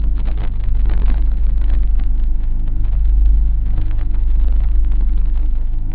one of my favorite sounds: a dark glitchy drone; made in Adobe Audition
dark, loop, 1-bar, drone, glitch, processed, click